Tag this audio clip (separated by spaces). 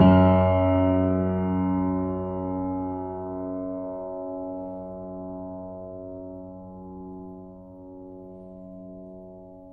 german multi old piano